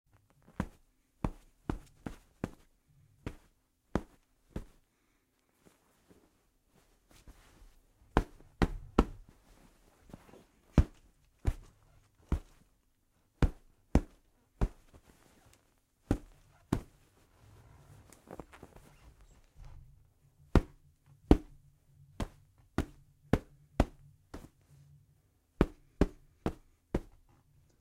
FX Body Blows 01
Punching a pillow for some body blow FX for Mission: Rejected.